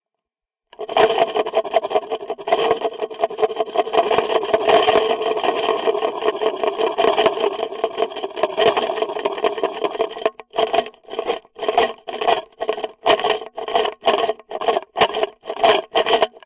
A contact microphone recording spoons jangling.